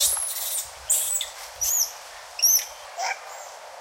Two Long-tailed Macaques calling to each other. Recorded with a Zoom H2.
field-recording, macaque, monkey, primates, squeak, zoo